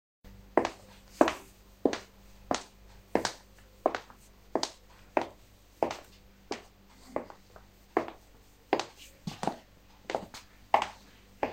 Walking in heels
I put on my heels and I went for a walk.
Recorded from Huawei P40 Lite.
heels, shoes, sound, walking, woman